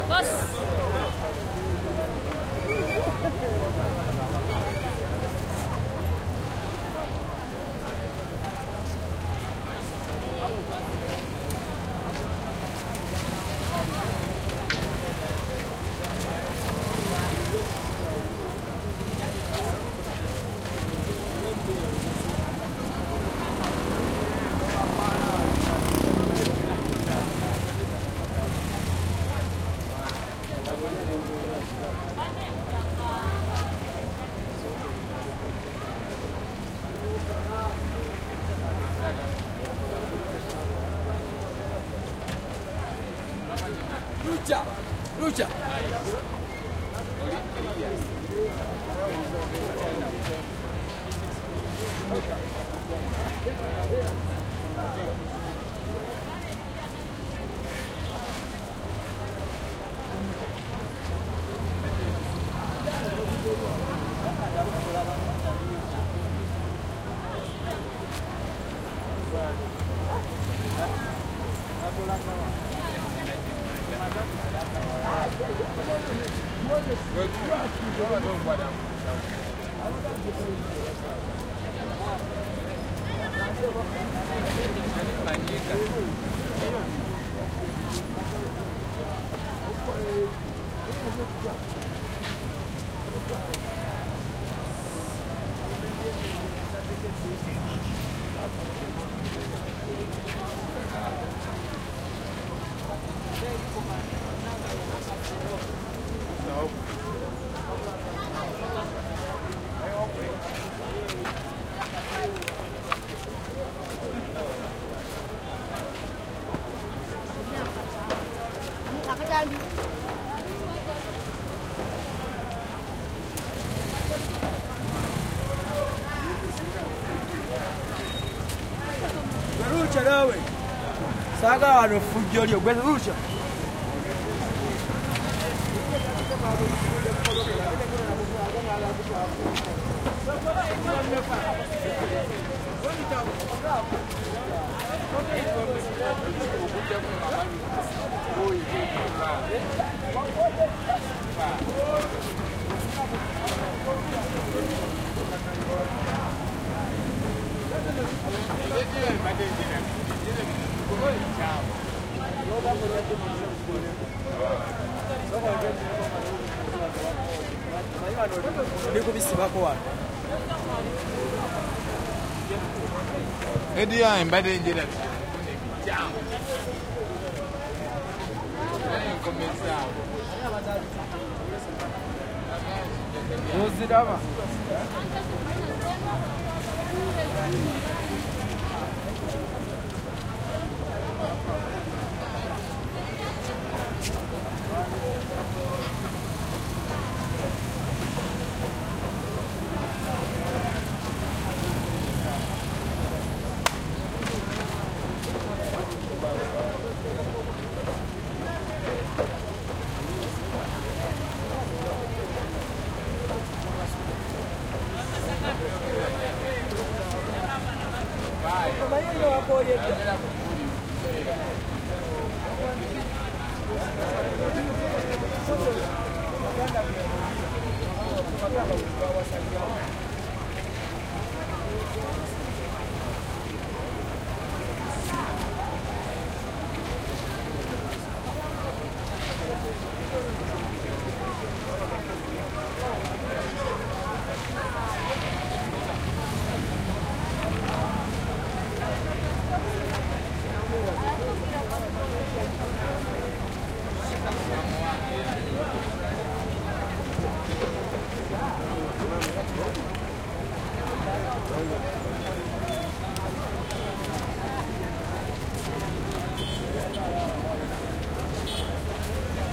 market ext night on busy boulevard voices and scratchy steps and throaty motorcycles pass nice textures1 Kampala, Uganda, Africa 2016
Africa, boulevard, busy, ext, market, motorcycles, night, people, steps, Uganda, voices